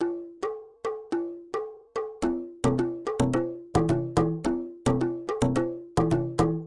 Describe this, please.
Pitched Percussion
Bongo, Percussion, Pitched, Rhythm